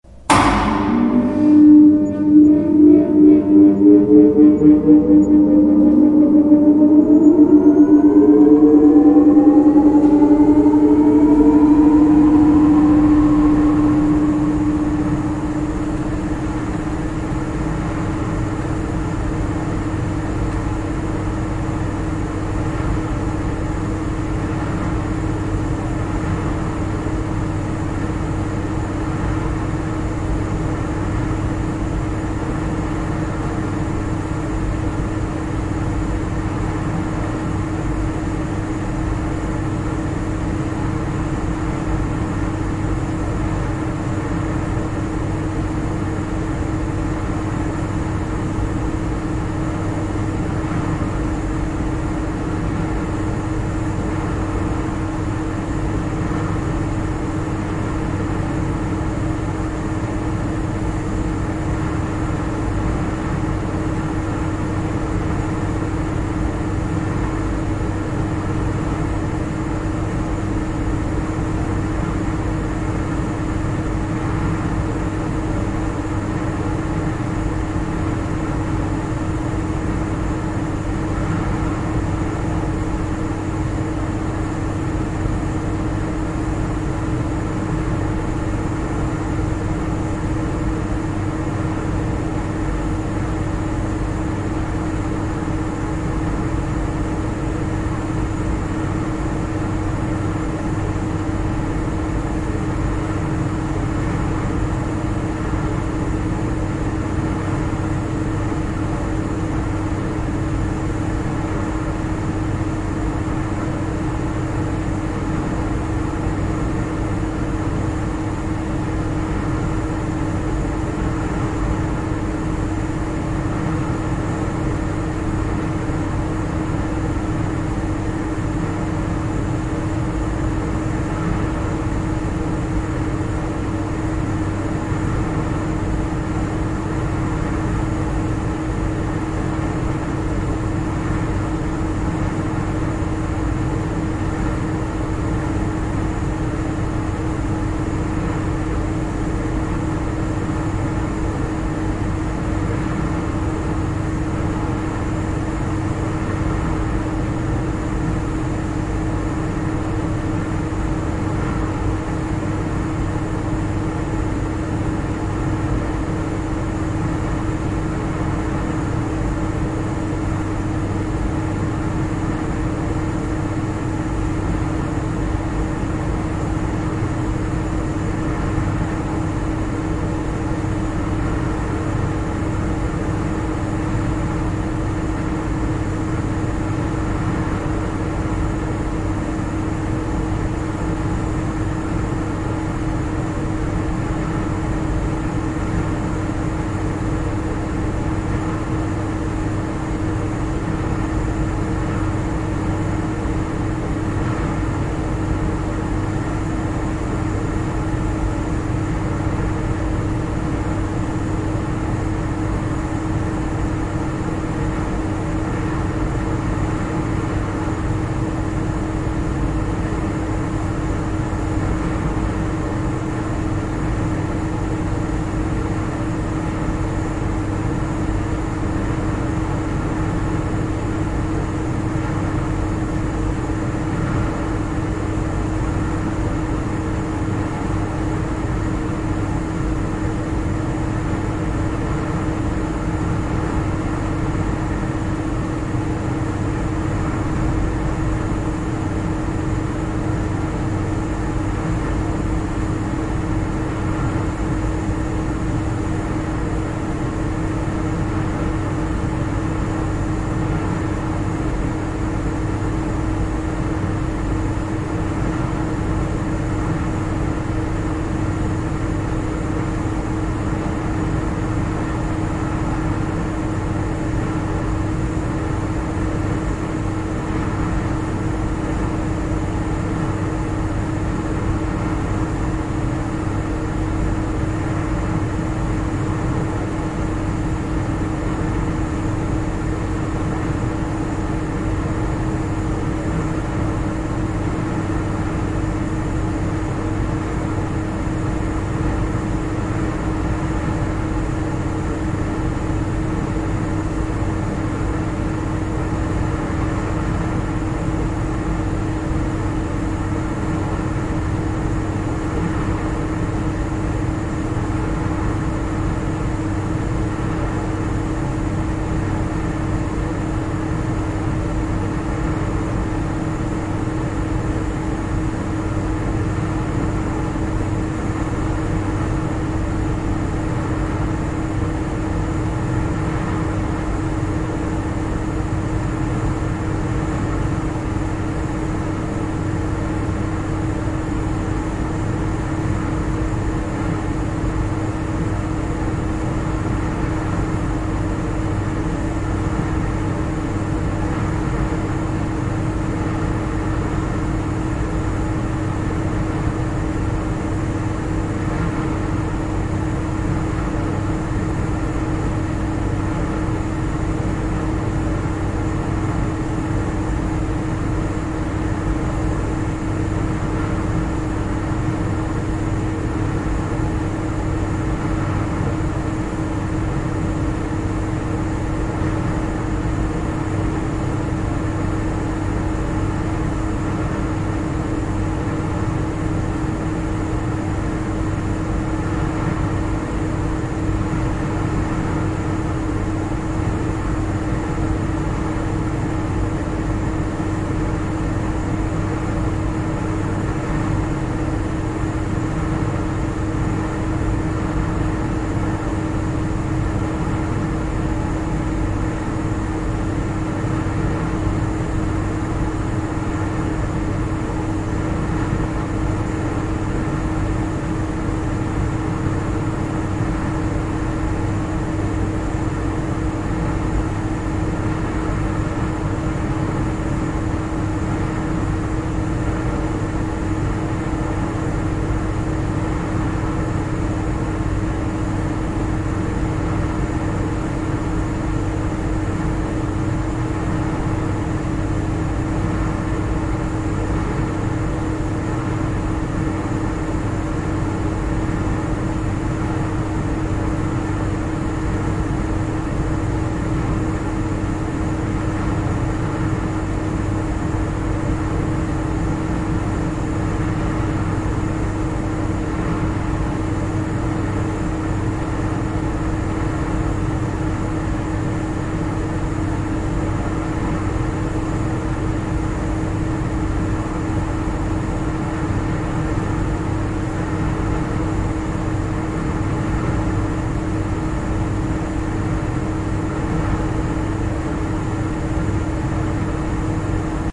engine start of church's motor
s, church, engine, motor, start